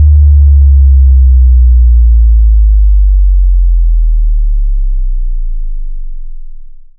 Bass Drop Huge
This is a very large, very deep bass drop generated by a program I created to create bass drops of configurable frequency and length.